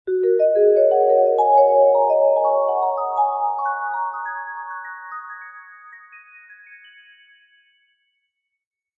[22] s-vibraphone penta up 1
Vibraphone notes I played on my Casio synth. This is a barely adjusted recording.
upwards, pentatonic